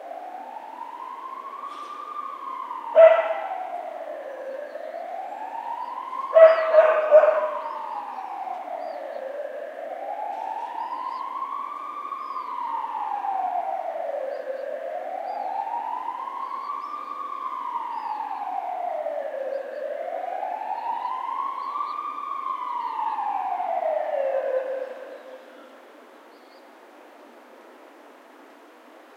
20061012.distant.ambulance
a distant ambulance siren along with some dog barks. The noise of the siren ends abruptly, presumably upon arrival to destination. ME62+AKGCK94 into iRiver H120 decoded to midside stereo / una abulancia lejana con algun ladrido de perro
ambulance, barking, field-recording, siren, streetnoise